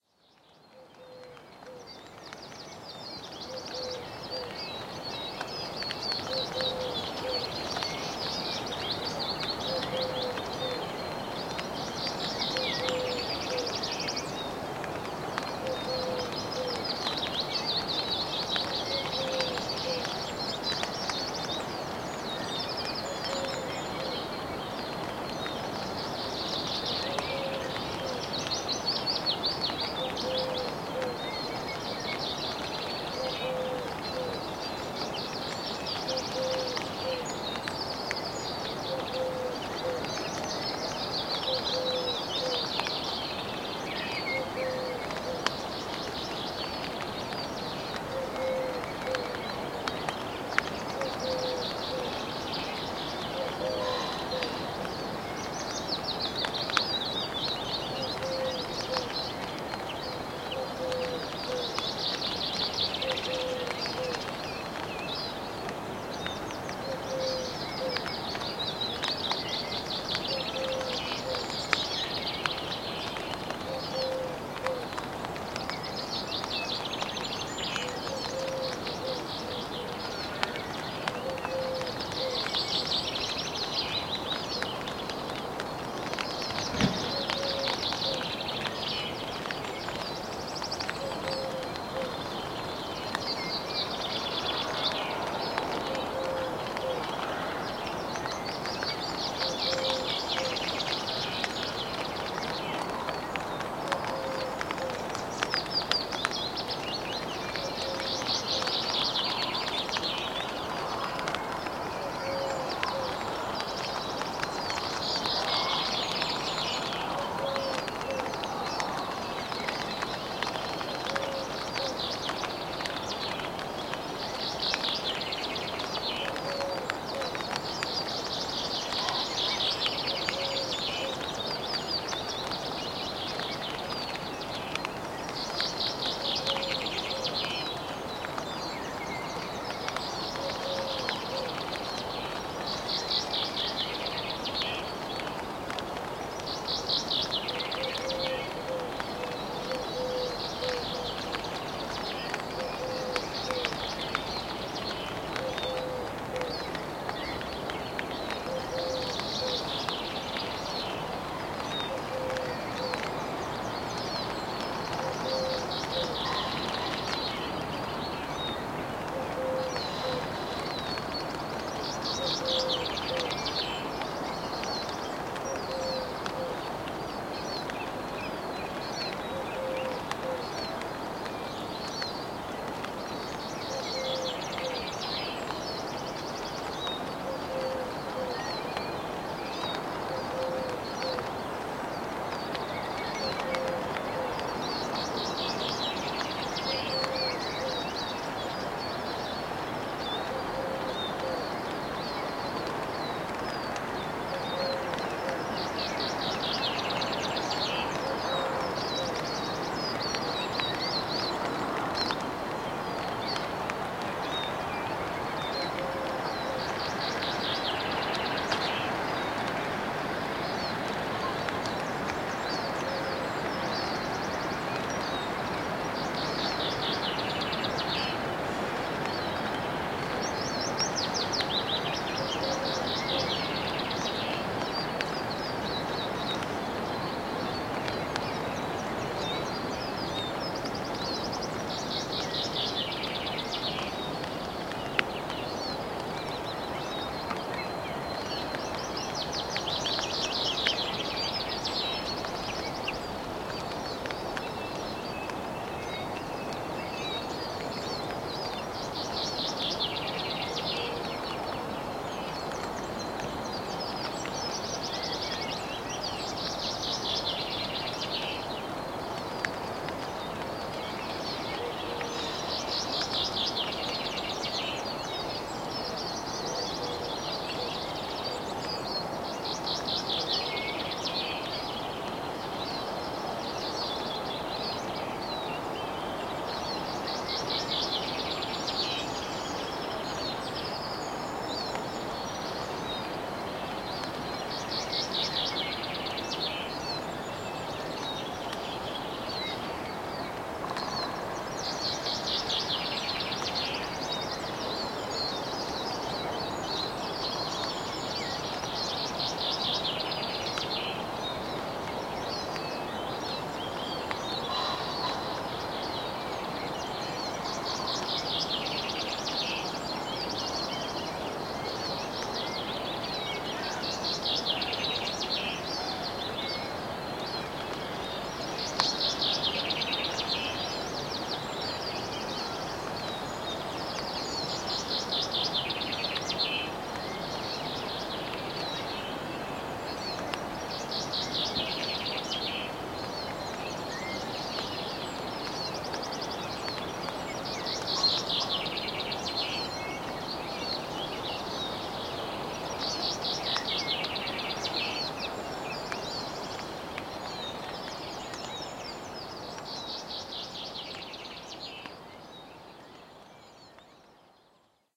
Morning-Drizzle
Drizzle in a tent at the Cumberland Campsite in Fort Augustus.